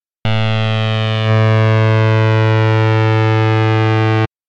Synth-FilterOpen-110
Analog synth playing a sawtooth wave pitched at A-1 (110Hz) with the filter open.
synthesizer, analog, filter